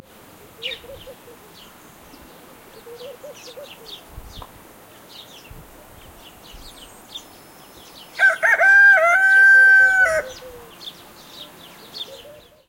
Chickens in Tarkastad
Classic Chicken crow in the small town of Tarkastad, Eastern Cape, South Africa.
Some other birds chirping inbetween!